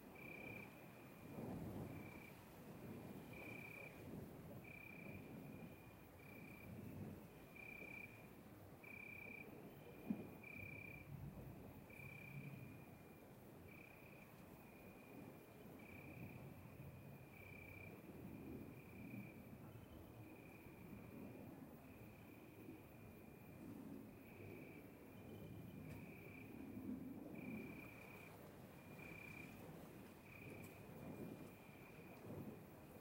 Night Ambient Loop
countryside, ambiance, loop, village, night, ambience, ambient, nature